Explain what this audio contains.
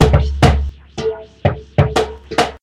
This is a drum sample I created with a $20.00 mic, audacity, and a drum I made from high-fire stoneware.
doumbek, drum, modified, percussion